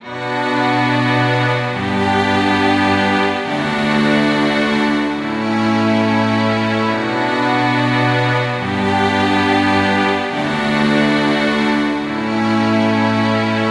Strings 8 bar 140bpm *1
Rather imposing string loop for your consumption